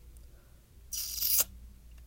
a small kiss version 1
female, kiss, sensual, sexy, woman